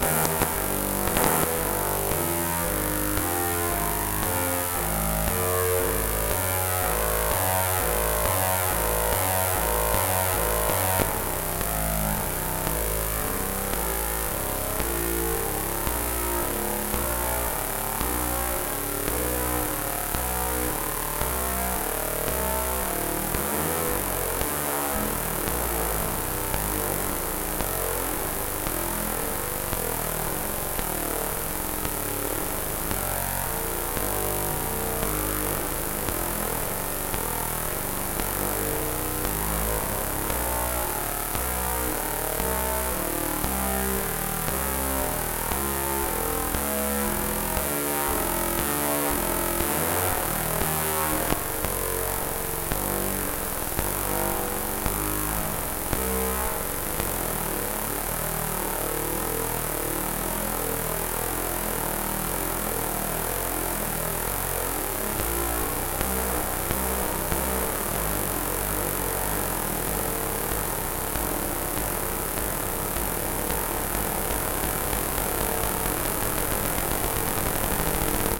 VCS3 Sound 10
Sounds made with the legendary VCS3 synthesizer in the Lindblad Studio at Gothenborg Academy of Music and Drama, 2011.11.06.
A sound with an industrial alarm-like character.